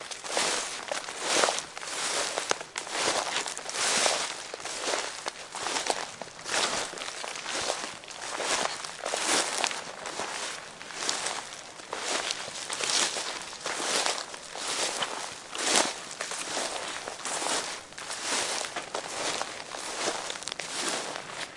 Footsteps in Crunchy Fall Leaves 1
Footsteps in Crunchy Fall Leaves you get the point.